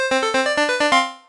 Game Sounds 1
You may use these sounds freely if
you think they're usefull.
(they are very easy to make in nanostudio)
I edited the mixdown afterwards with oceanaudio.
33 sounds (* 2)
2 Packs the same sounds (33 Wavs) but with another Eden Synth
19-02-2014
sfx, sound, game